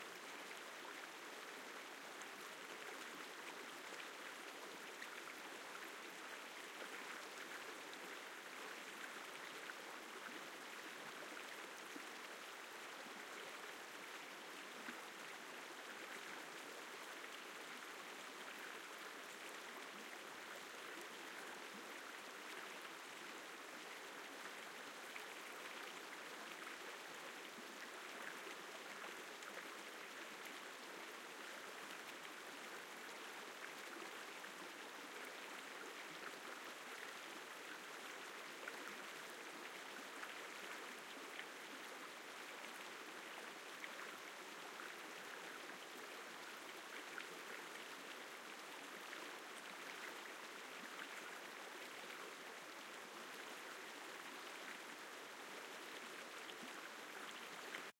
Mountain River 003
Stereo recording of a rocky mountain river in Alberta, Canada during autumn. Medium flow, small rapids, 6 foot proximity
relaxing, trickle, field-recording, rapids, creek, babbling, gurgle, current, water, flowing, flow, mountain, liquid, stream, ambient, nature, brook, river